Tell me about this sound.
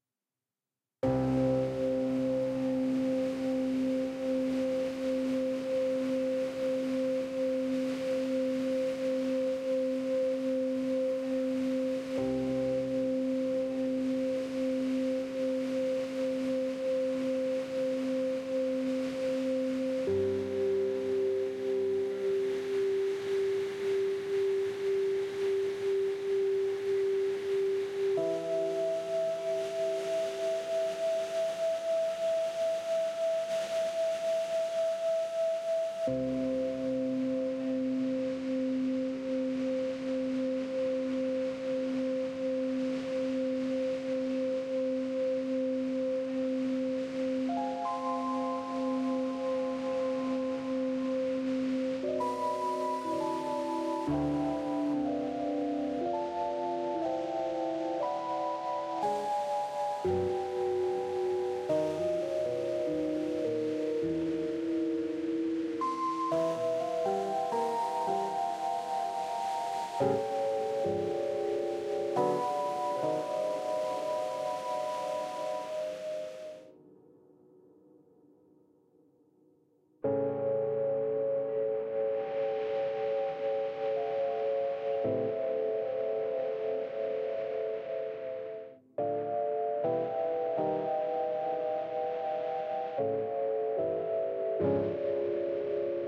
its a sample of a few sources. i use a an old piano i sampled, and a tibetan singing bowl